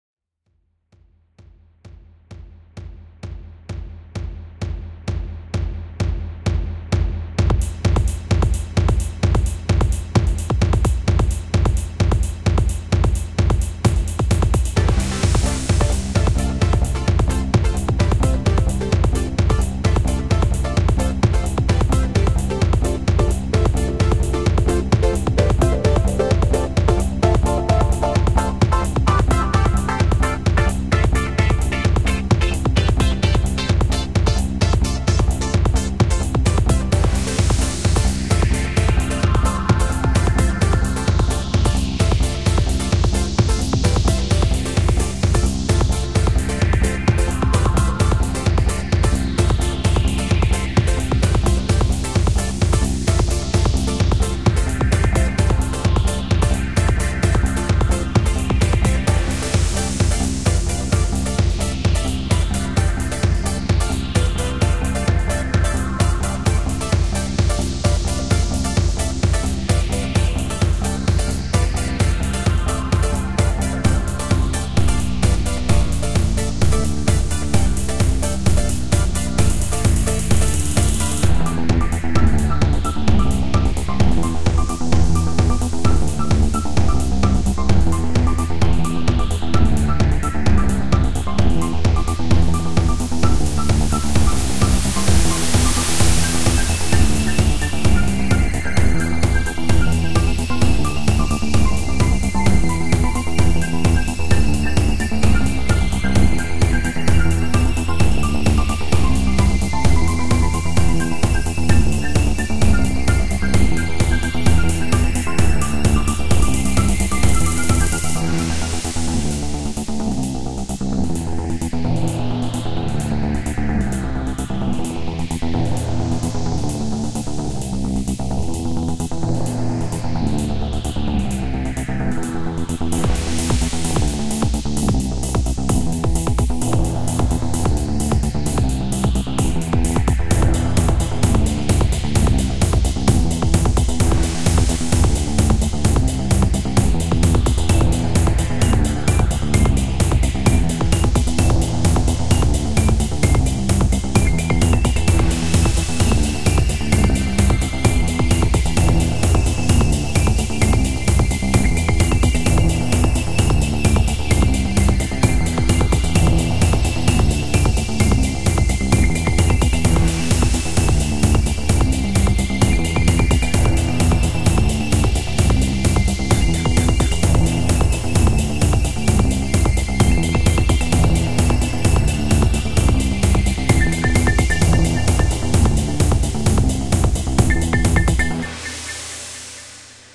Game loop for RPG game music (long loop). Create use Garageband and World Music and Remix Tools Jam Pack. 2013.01.15 07:17